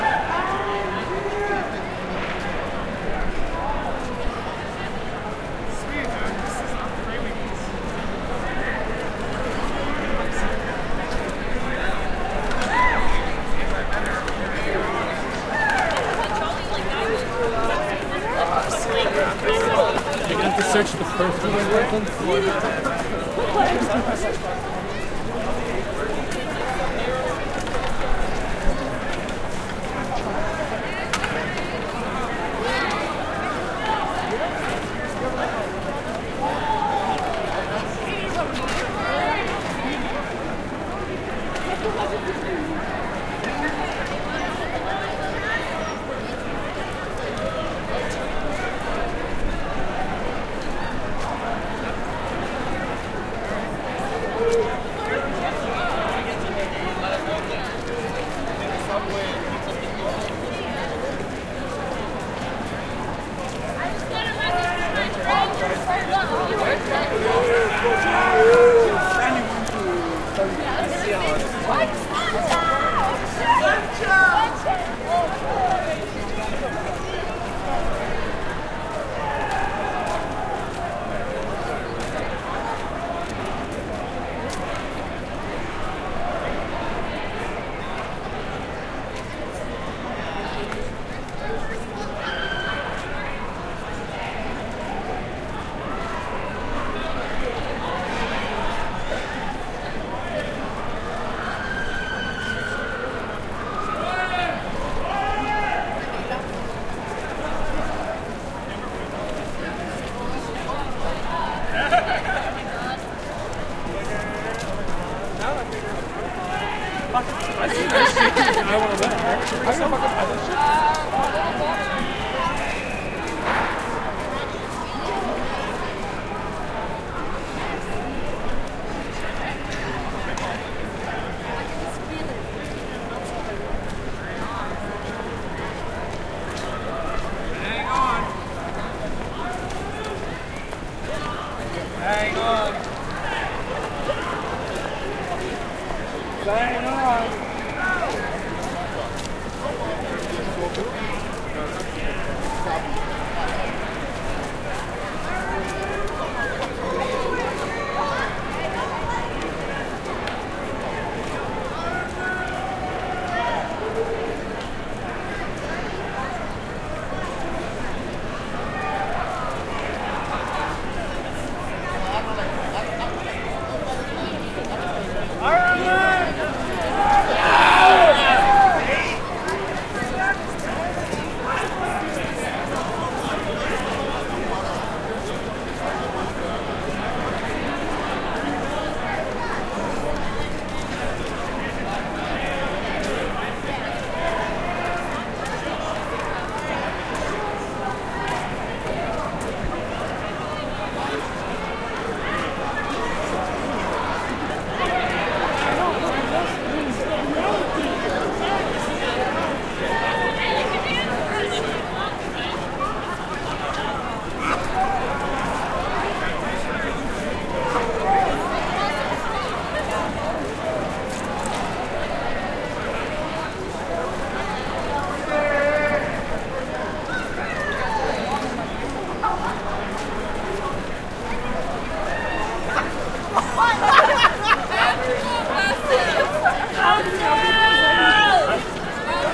crowd ext medium drunk after parade wide steps winter boots Montreal, Canada
crowd, boots, drunk, ext